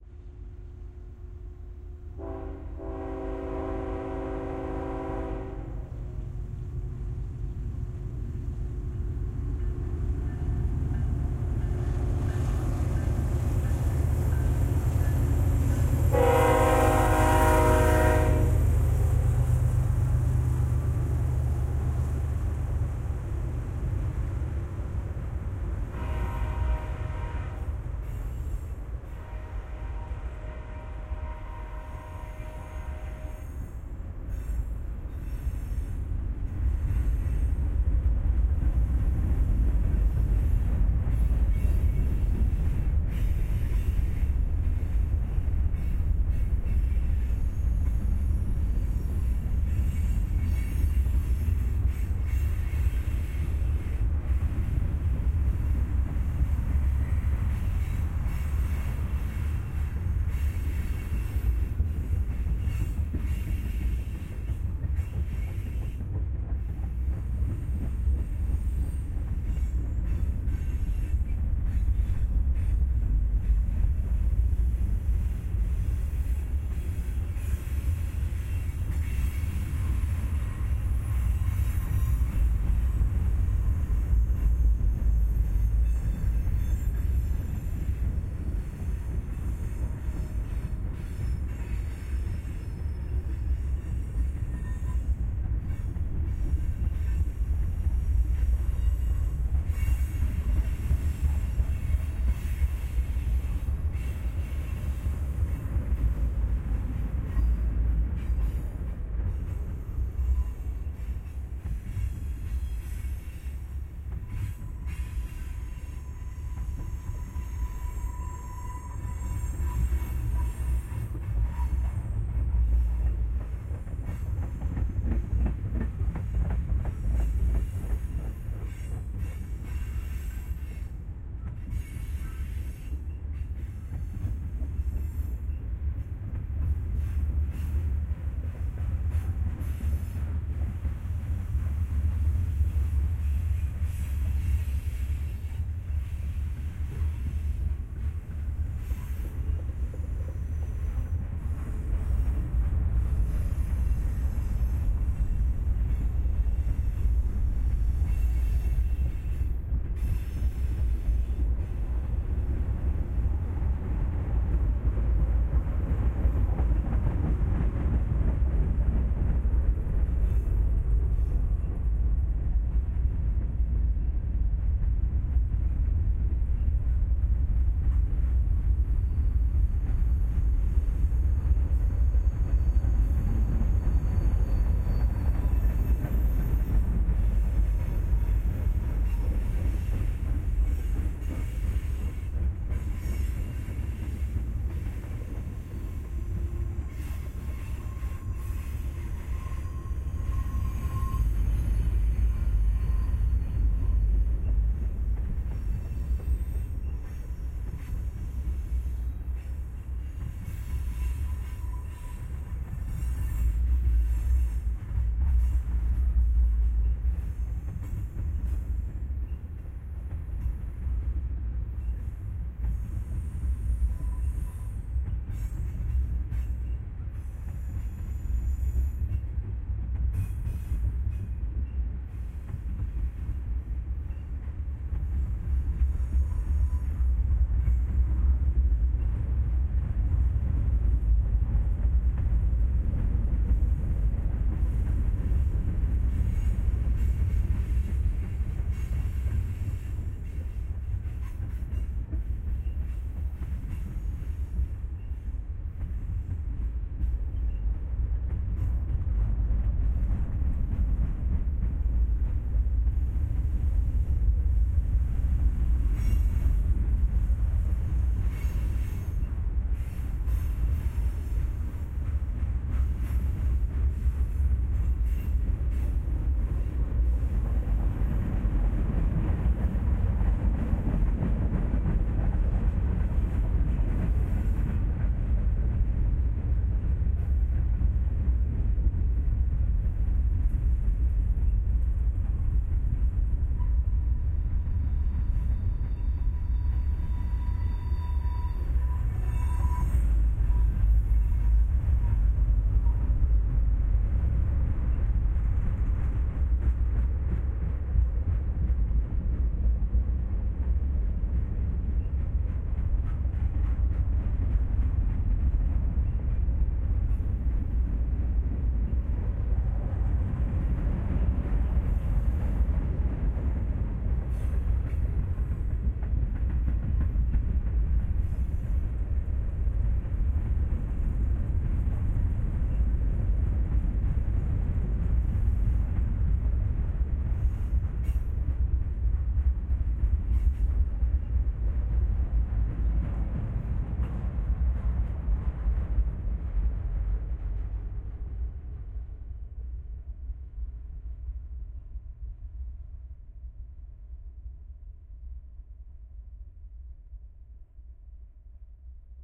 Freight Train Slow4 - Mixdown
real trains passing by. Zoom H6n onboard XY stereo mics, MKE600, AT2020 combined in stereo mixdown. Used FFT EQ to really bring out rumble.